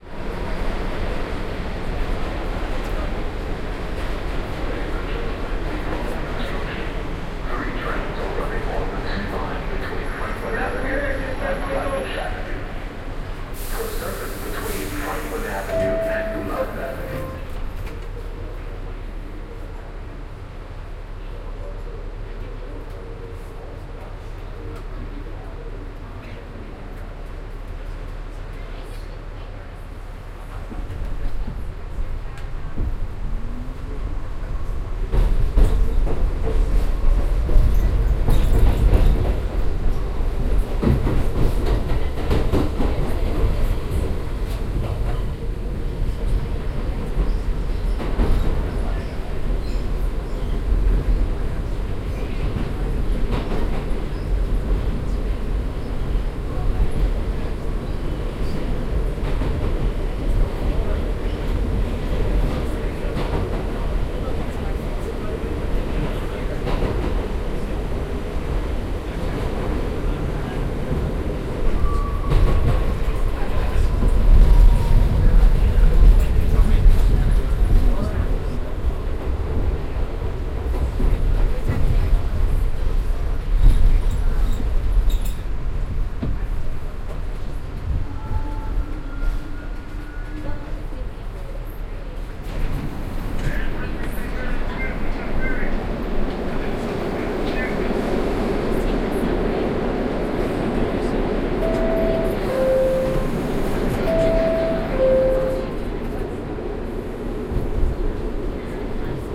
2100 AMB NewYork Subway Station inside
little ambience in OKM binaural from a subway station in new york